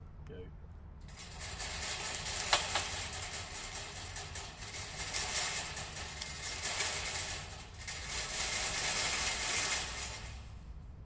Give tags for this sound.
Rattle; Chain; Link; Fence; Shake

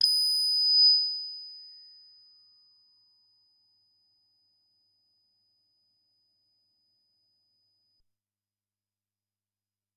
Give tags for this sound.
analogue; deckardsdream; Eb8; synthetizer; multisample; ddrm; cs80; midi-note-111; single-note; synth; midi-velocity-16

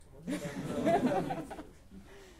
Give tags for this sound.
people; joke; human; funny; laugh

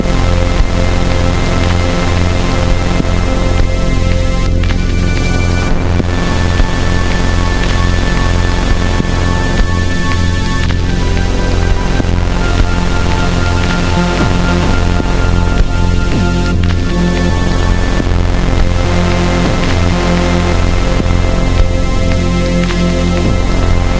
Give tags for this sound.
80bpm; F; major

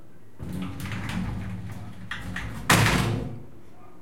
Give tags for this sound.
close; closing; door; glass; shower; slam; slamming; sliding

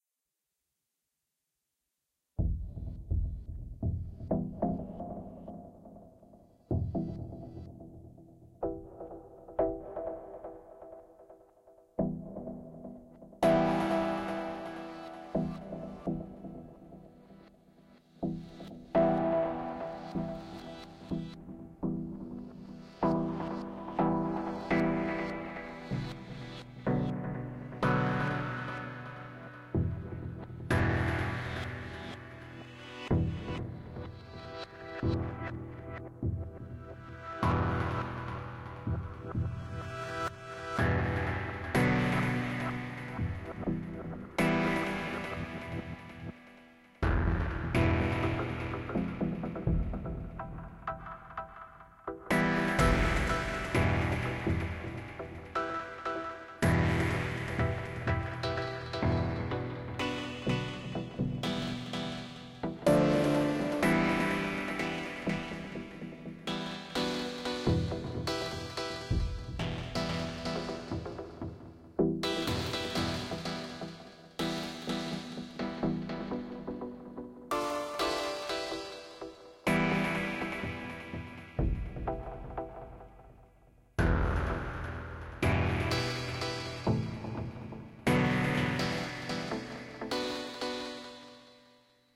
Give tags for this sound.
dub; idiomatic; scripted; script-node; processing

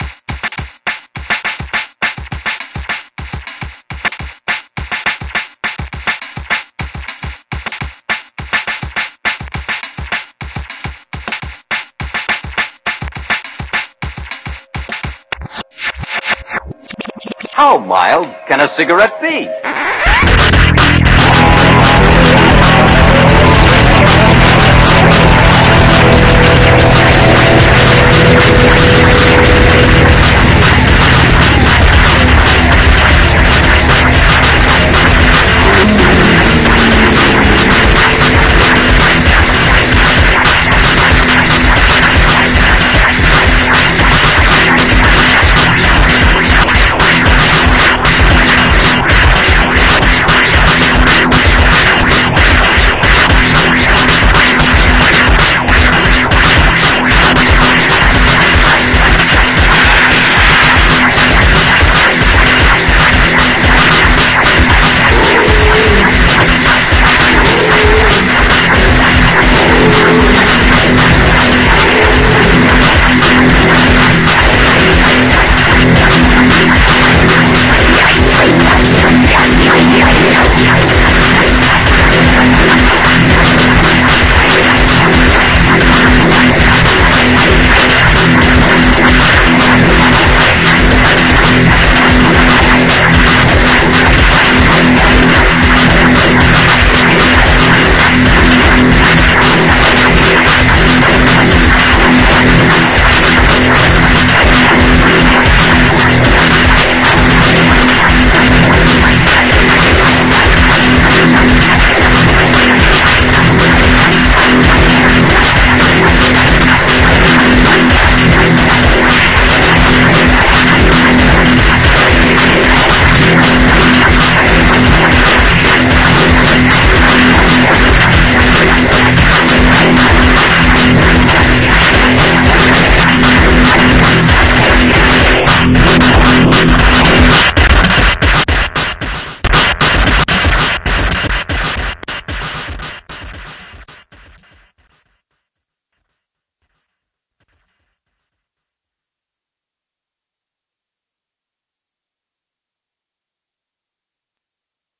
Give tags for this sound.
noise; electric; song; zap; beat; bass; boom

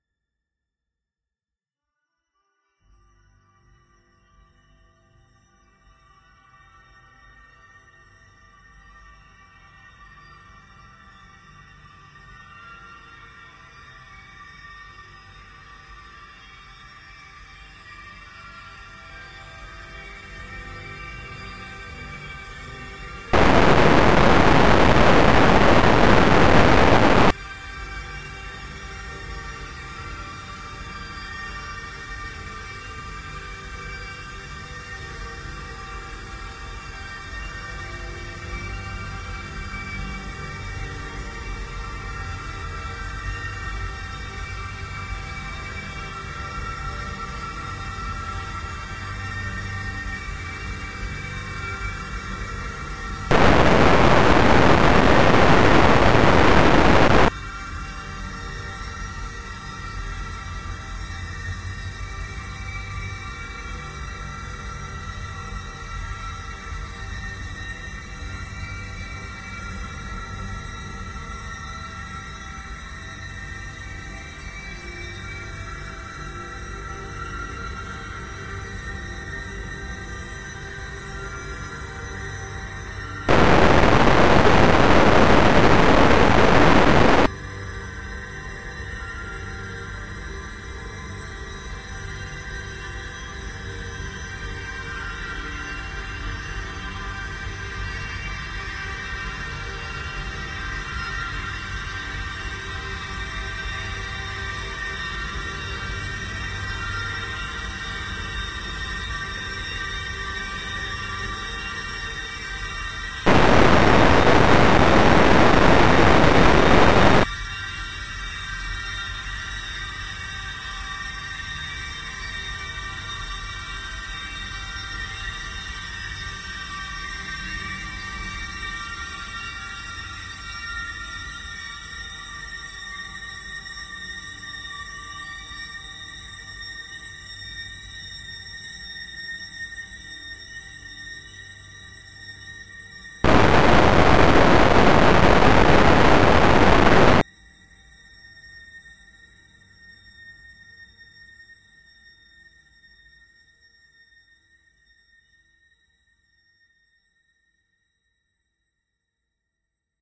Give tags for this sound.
ambient; artificial; drone; evolving; pad; space

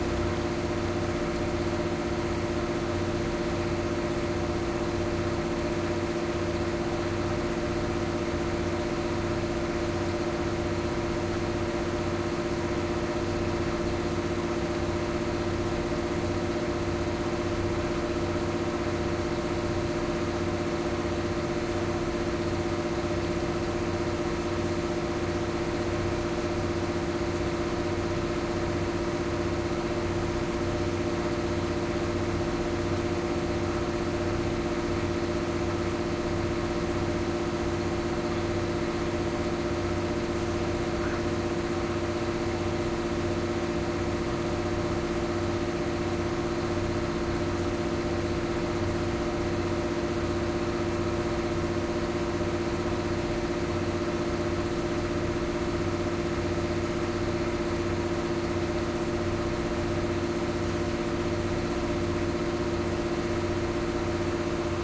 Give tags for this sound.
barge; boat; diesel; ferry; onboard

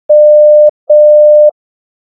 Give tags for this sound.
recreation; original-series; communicator; call; beep; star-trek

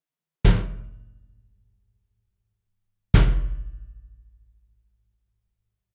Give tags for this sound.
bonkerino; bonk; bonking

